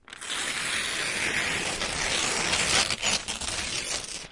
rustle.paper Tear 6
recordings of various rustling sounds with a stereo Audio Technica 853A